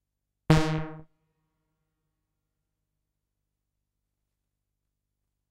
seq-sawbass mfb synth - Velo127 - 051 - d#2
A sawbass sound recorded from the mfb synth. Very useful for stepsequencing but not only. Velocity is 127.